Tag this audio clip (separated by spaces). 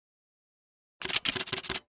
dslr photograph sfx shutter